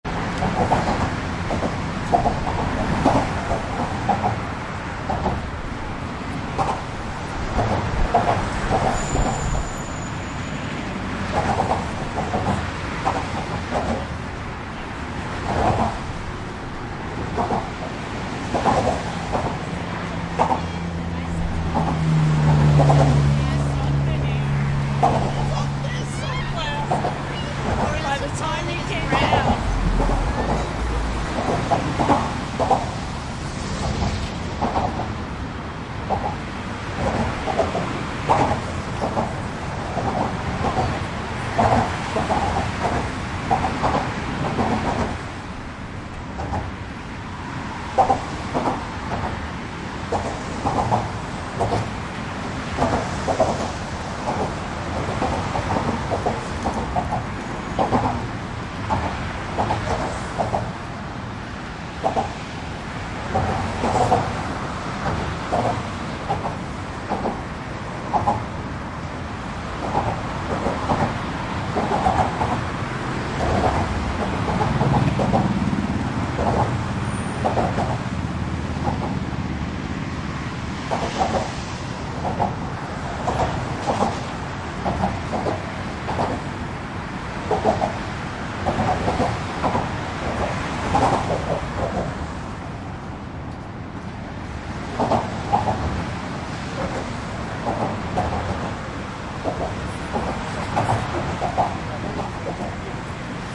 traffic bumpy below Brooklyn bridge from bike path NYC, USA
traffic, USA